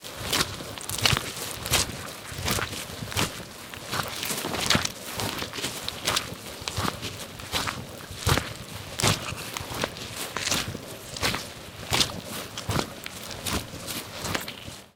Boots walking through short grass. Heavy rain the previous day had created a large amount of mud which can also be heard in this recording. Should be enough space in between each step to be effectively cut up for Foley work.
Recorded with a NTG2 mono shotgun microphone into a R05 recorder.